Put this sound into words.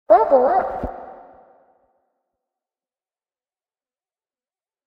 A sound effect I made for my indie game projects.